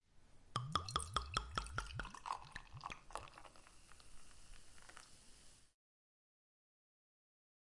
pouring beer from a bottle into a short glass